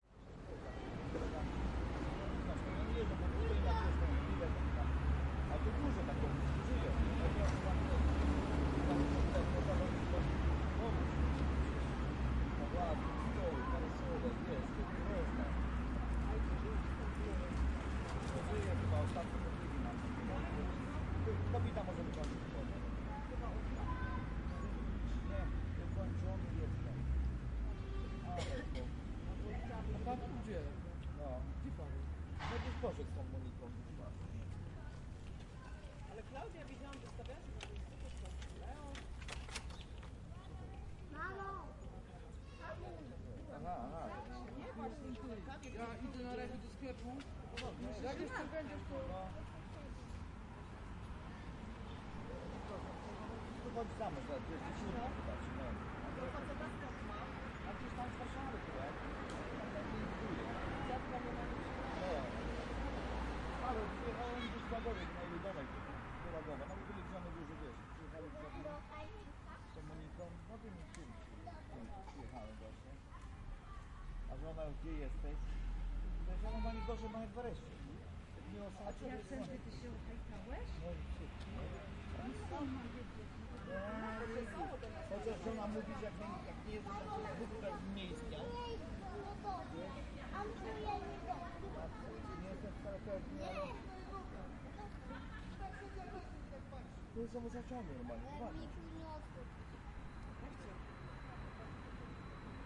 08092014 Torzym Freedom Square
Fieldrecording made during field pilot reseach (Moving modernization project conducted in the Department of Ethnology and Cultural Anthropology at Adam Mickiewicz University in Poznan by Agata Stanisz and Waldemar Kuligowski). Ambience of Plac Wolności (Freedom Square) in the center of Torzym (Lubusz) near of the national road no. 92. Recordist: Robert Rydzewski. Editor: Agata Stanisz. Recorder: Zoom h4n with shotgun.
square, torzym, lubusz, poland, street, field-recording, traffic, cars